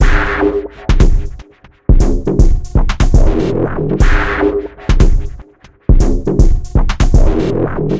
Massive Loop -44
An weird experimental loop with a minimal and melodic touch created with Massive within Reaktor from Native Instruments. Mastered with several plugins within Wavelab.
drumloop, experimental, loop, minimal